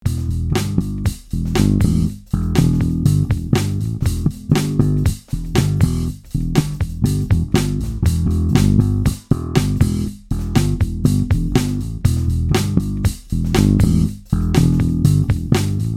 Rock Pattern Key of E 120 BPM A
Bass and Drum Loop - Brand New Bass Strings - Come get 'em while their HOT.
Blues, Rock, Loop, Jam, BPM, Rhythm, Jazz, Drums, Country, Guitar, Drum, Beat, Backing, Bass